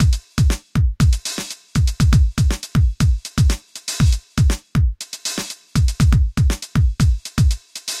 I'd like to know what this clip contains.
A collection of sounds created with Electribe MX1 in Vemberaudio Shortcircuit, some processing to get Toms & Hats, and a master multiband limiter to avoid peaks.
Col.leció de sons creats amb una Electribe MX1 samplejats i mapejats en Vemberaudio Shortcircuit, on han sigut processats per obtenir Toms, Hats i altres sons que no caben dins dels 9. Per evitar pics de nivell s'ha aplicat un compressor multibanda suau i s'ha afegit una lleugera reverb (Jb Omniverb) per suavitzar altres sons.
Enjoy these sounds and please tell me if you like them.
Disfrutad usando éstos sonidos, si os gustan me gustará saberlo.
Disfruteu fent servir aquests sons, si us agraden m'agradarà saber-ho.
Shortcircuit, StudioOne, JuliusLC, Electribe, Vemberaudio, EMX1